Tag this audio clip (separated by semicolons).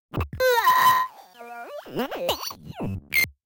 circuit-bent; electronic; freakenfurby; furby; glitch; toy